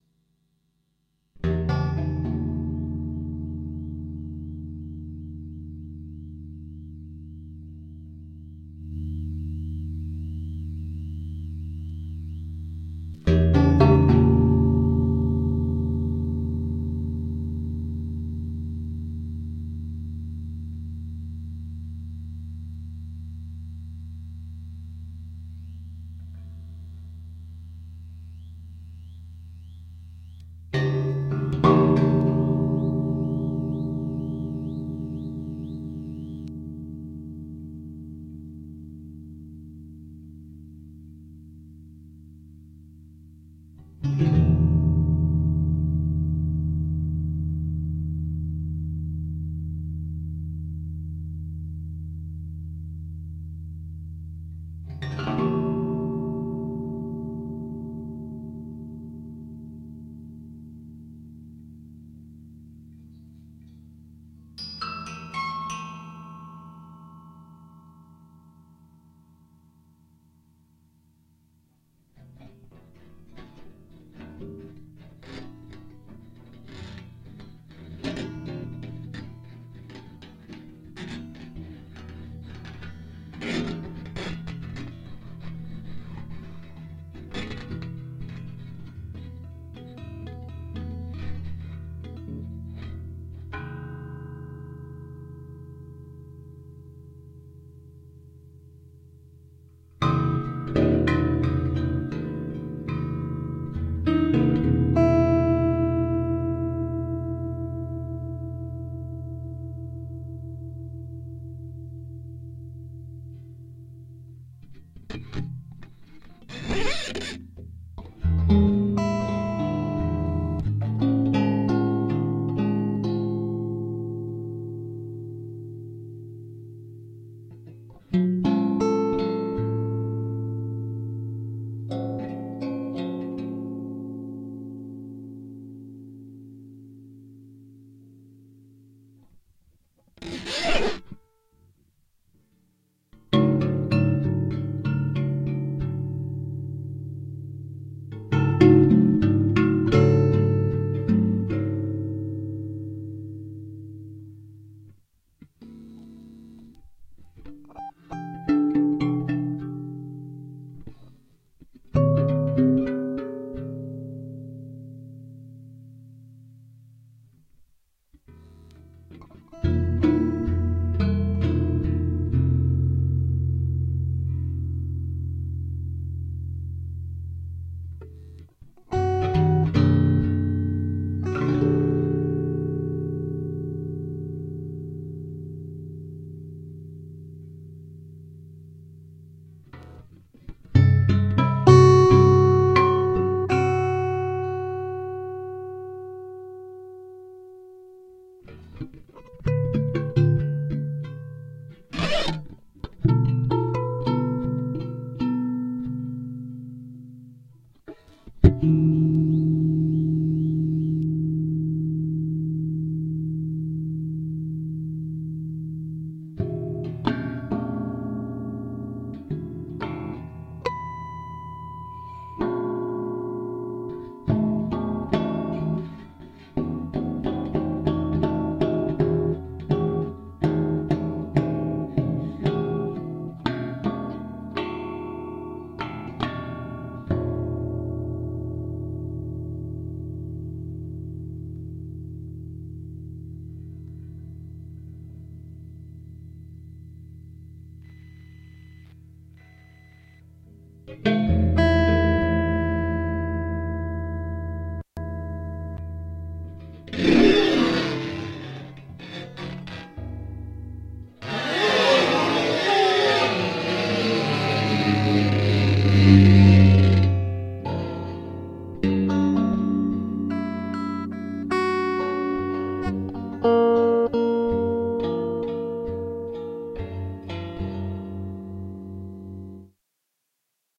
prepared-guitar
Some improvised, prepared guitar
improv, improvisation, guitar, Prepared